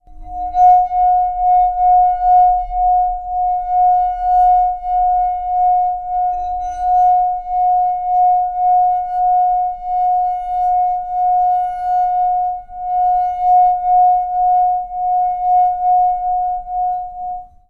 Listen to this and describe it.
Making a wine glass sing with a wet finger. The pitch is determined by the amount of liquid in the glass. Same pitch as SingGlass3.